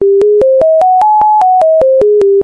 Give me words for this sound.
Ascending and descending frequencies 03
Created using Audacity
200ms intervals
ascend, ascending, frequencies, frequency, hz, kHz, rising, sine, sinewave, solfeggio, synthesis, tone, wave